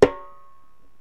another rim shot on my snare with no snap.